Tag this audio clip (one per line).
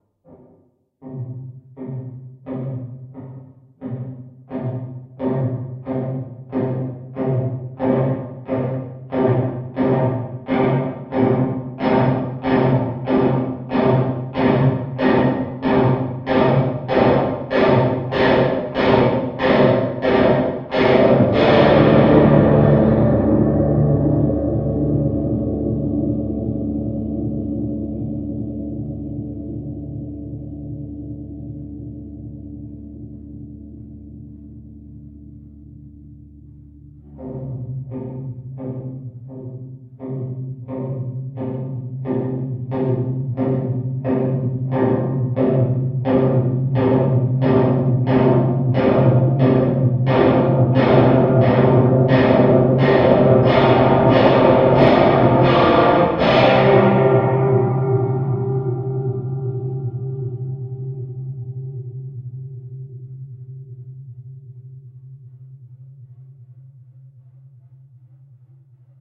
afraid
creepy
fear
fearful
frightful
ghost
haunted
horror
nightmare
scary
sinister
spectre
spooky
terrifying
terror
thrill